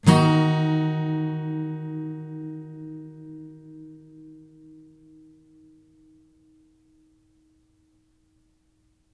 chord Eflatm
Yamaha acoustic through USB microphone to laptop. Chords strummed with a metal pick. File name indicates chord.
acoustic, chord, guitar, strummed